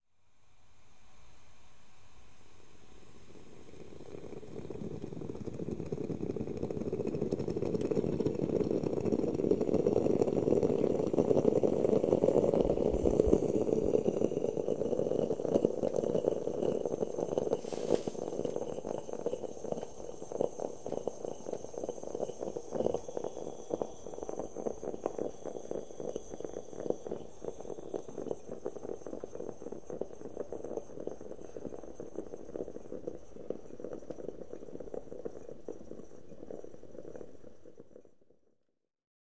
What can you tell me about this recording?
Coffee machine on electrical cooktop, a classic Bialetti 2 Cups. Coffee cooks.